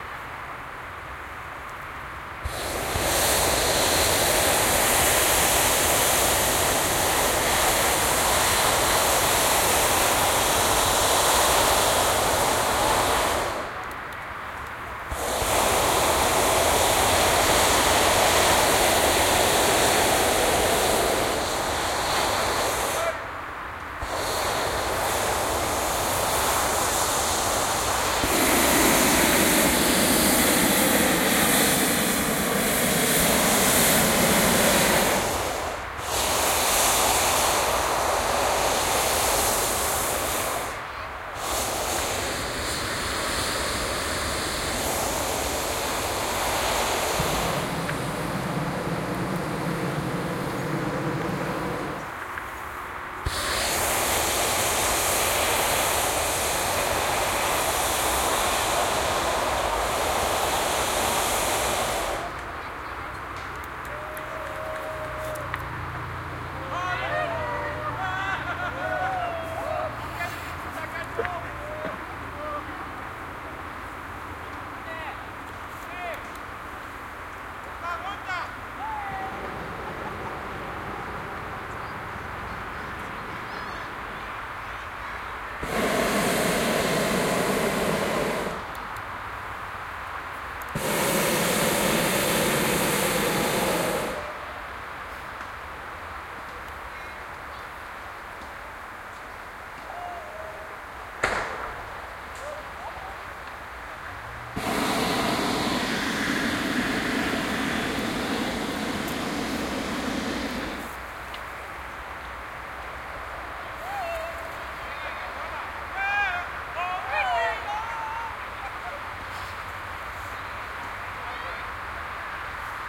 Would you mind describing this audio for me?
This recording was done on the 24.08.2005 in a park in Hanover, where two hot air ballons took off, using the Soundman OKM II and a Sharp IM-DR 420 MD recorder. In the clip one can hear some people cheer, when the two ballons take off.